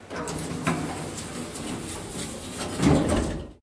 closing elevator doors 2
The sound of closing elevator doors in a hotel.
lift, open, opening